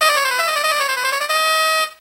Sounds of electronic toys recorded with a condenser microphone and magnetic pickup suitable for lofi looping.
loop, loops, lofi, toy, electronic